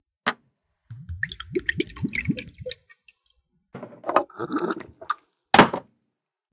Pour Shot Drink Slam
Pouring liquid in a shot glass, picking it up, drinking & slamming it down (not too hard) on the table.
drink, liquid, pour, shot, slam